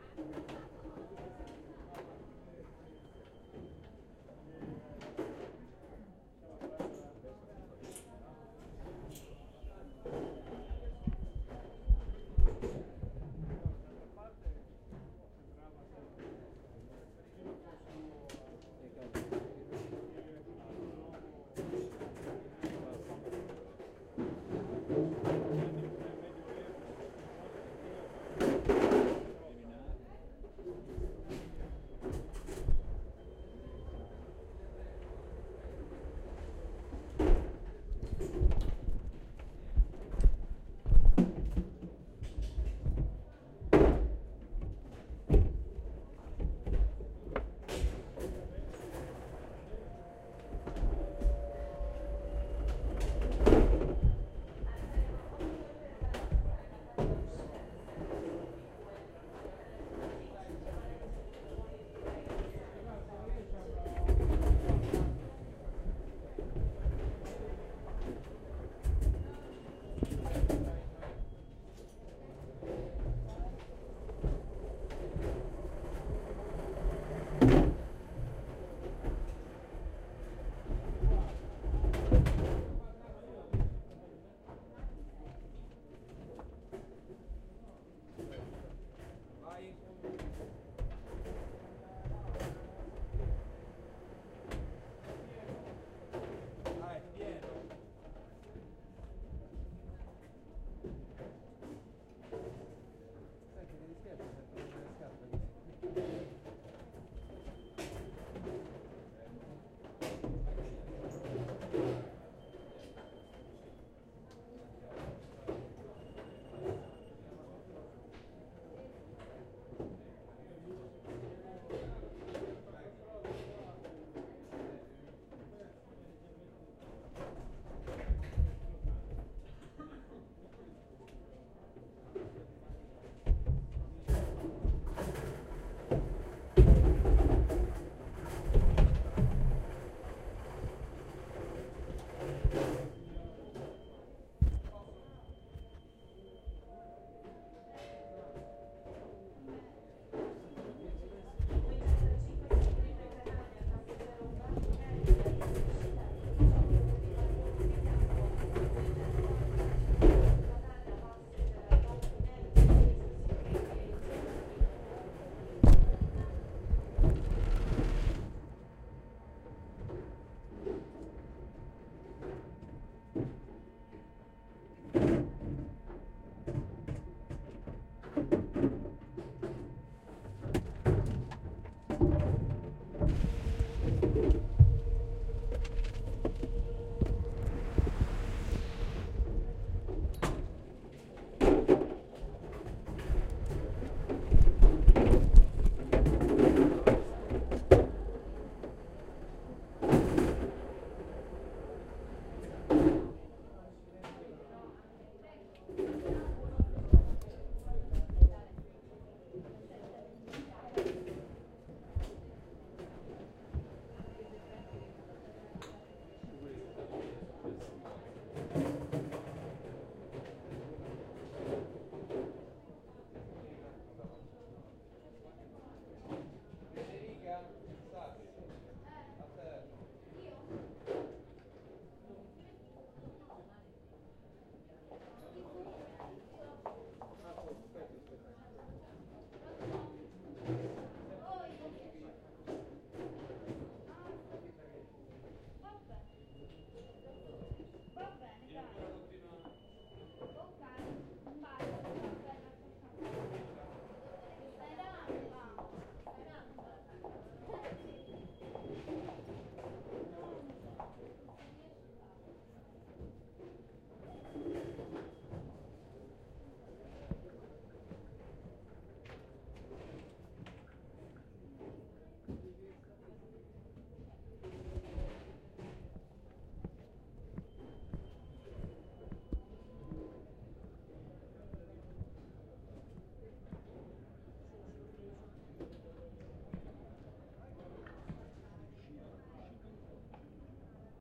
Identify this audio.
STE-042 x-ray ride
I turned on my recorder and sent it through the X-ray machine at the security checkpoint in the Rome airport.
airport, field-recording, luggage